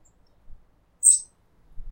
Birds chirping in my garden